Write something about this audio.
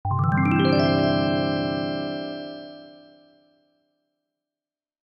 An airy appregio for videogames / animation.